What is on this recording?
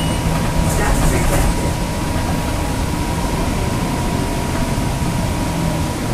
Recorded during a 12 hour work day. Voice filter mode, activated... testing one two three.
transportation, public, field-recording, bus